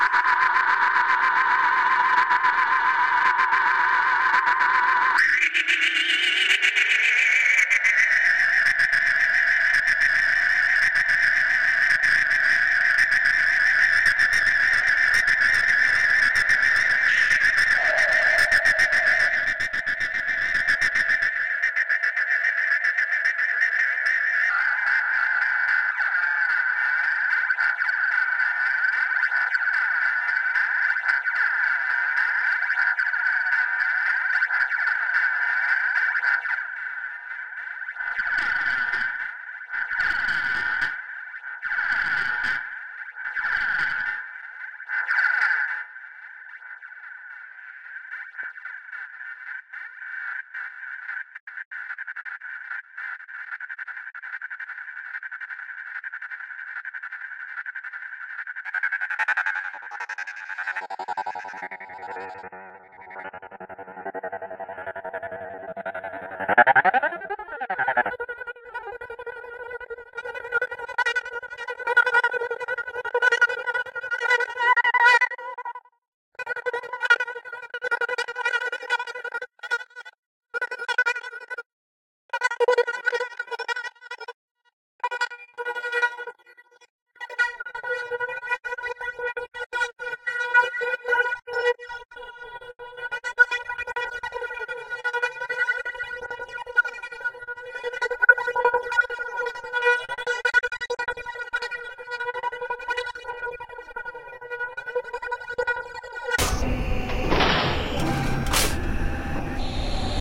Questionable noise made like a Frankenstein monster on koala sampler.
You’re welcome.
If this becomes useful, please do tell, with links I’d like to hear your stuff.